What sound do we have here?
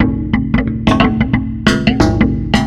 Done with Redrum in Reason